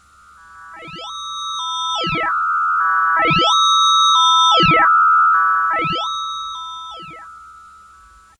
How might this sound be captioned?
pss480freqbeats
small frequency change beat on a yamaha pss 480.
beat, space, keyboard, synth, radio